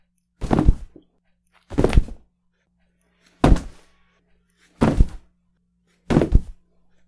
Falling body on floor, layered (pants filled with shoes + heavy stone), AKG2006 + Audacity